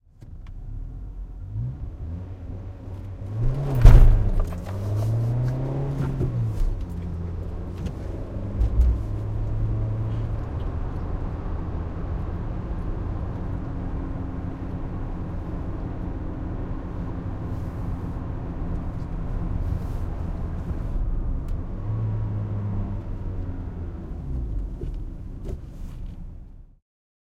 peugot 206 car interior accelerate acceleration aggressively
Recorded with a Sony PCM-D50 from the inside of a peugot 206 on a dry sunny day.
Pulling up from a traffic light rather effectively, driver chuckles a little.
206, accelerate, acceleration, aggressively, interior